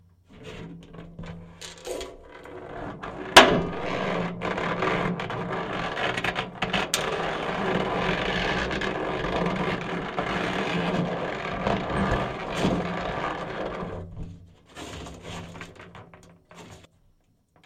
rubbing squishy ball on metal01
Contact mic recording on a large metal storage case. Rubbing a small, bumpy ball on the surface.
bang, contact-mic, creak, creaking, hit, impact, metal, metallic, percussion, piezo, rub, rubbed, rubber, rubbing, smack, strike, struck, whack